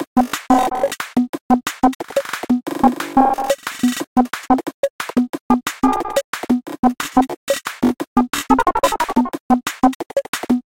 16bit Computer music loop originaly made for hardtek music. blips and blops.
180-bpm, loop, music-loop, naive, stupid, techno